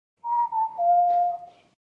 Whistle Project 4
I did some whistling and effected the speed, pitch, and filters in a few ways